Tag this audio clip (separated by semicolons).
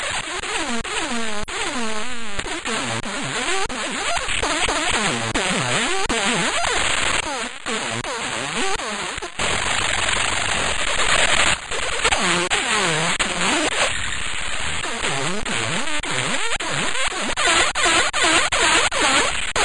processed; data; harsh; noise; glitch